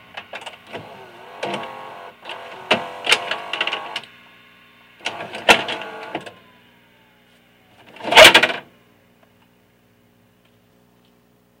VHS eject
Sound of a VHS tape being ejected.
Eject, Videotape, VHS